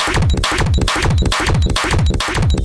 hello this is my TRACKER creation glitchcore break and rhythm sound